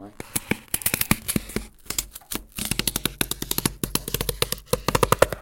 Queneau Carton 02
grattement sur un carton alveolé
scrape, pencil, scratch, cardboard, paper, scribble